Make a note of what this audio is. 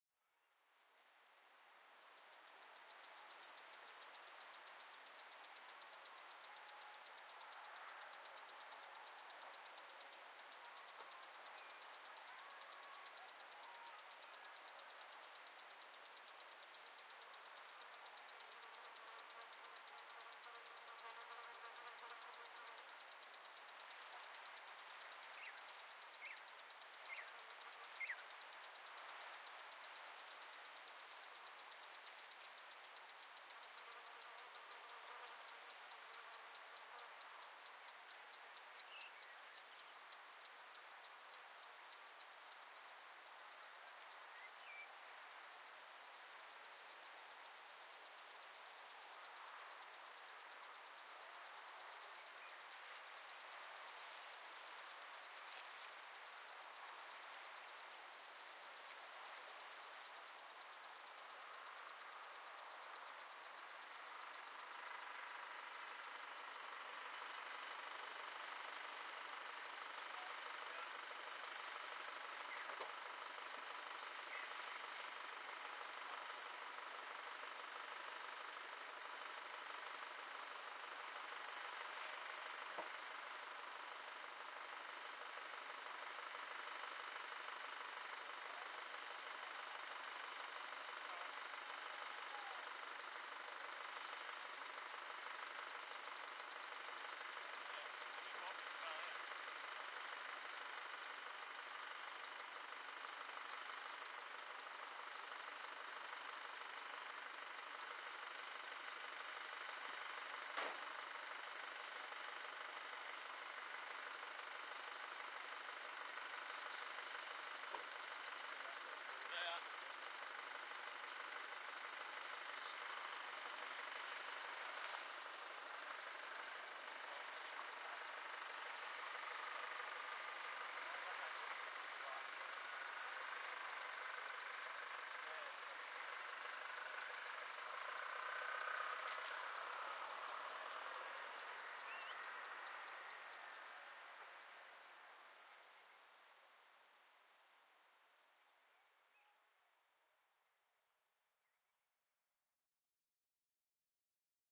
warrnambool sprinkler insect
field recording EQed of native cicadas in the and dunes near warnambool in victoria 2014 ambience soundscape